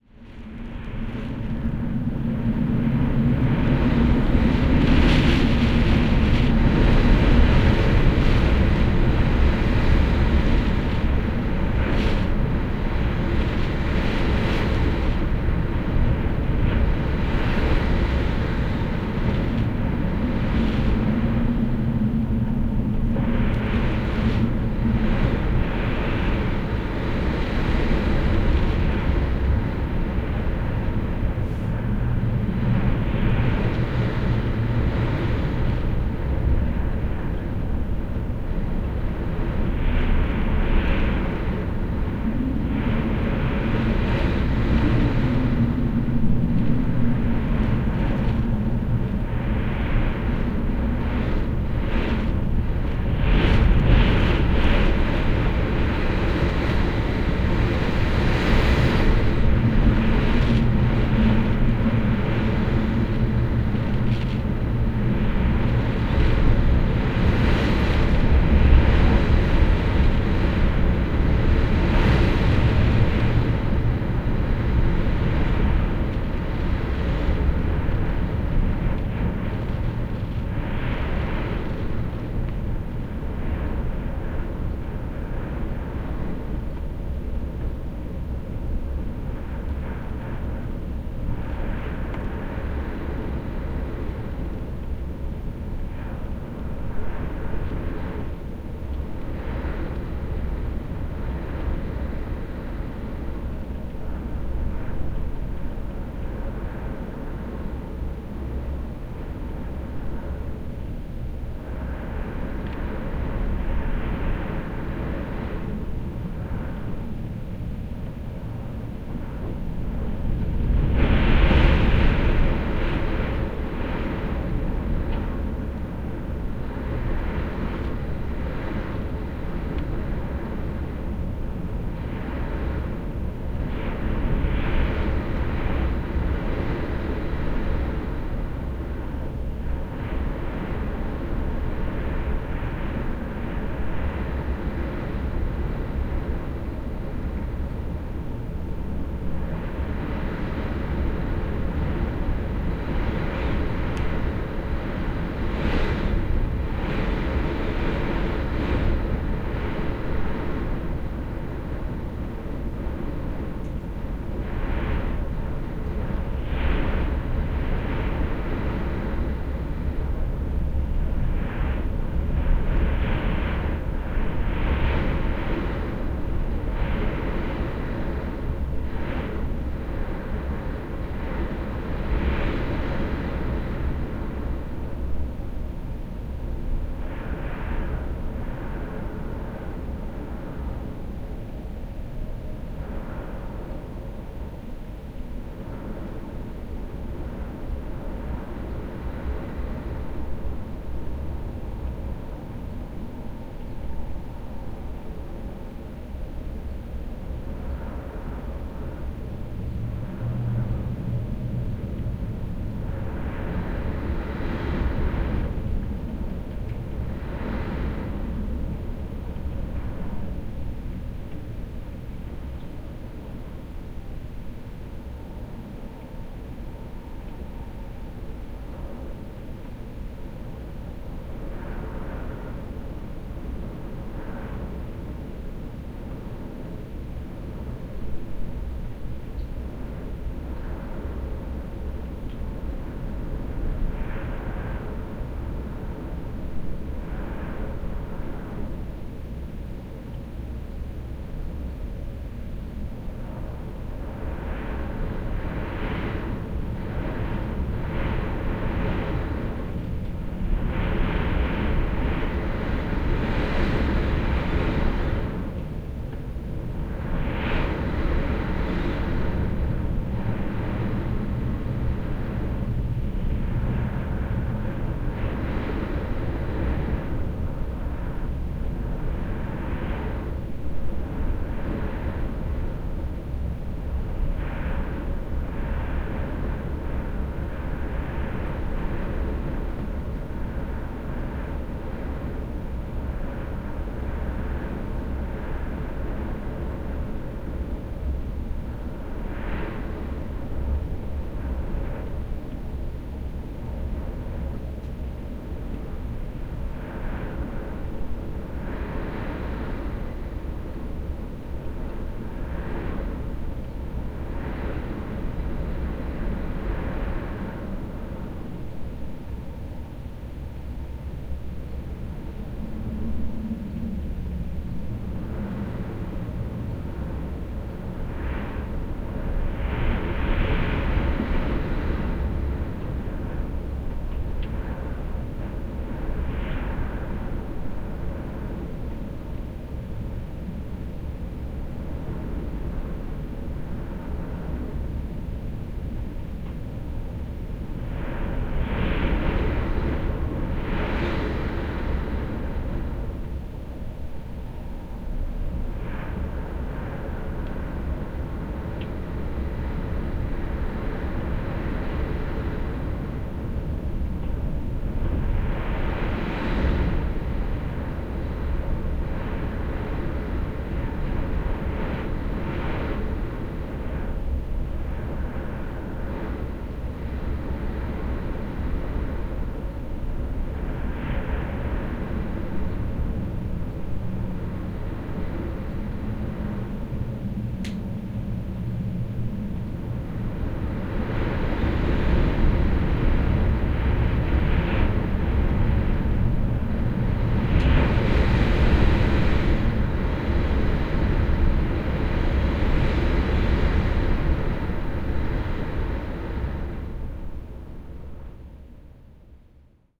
Interior Wind Noise Zoom H6
I was woken up at 2am to the noise of strong wind blowing on my window. There is some rain on the window at the beginning that dies off fairly soon.
Used my trusty Zoom H6 stereo attachment to quickly catch something before it died down.
I have used a little treatment to roll off the hiss in the top end but this hasn't made too much of an impact on the overall sound.
wind, interior, sfx, weather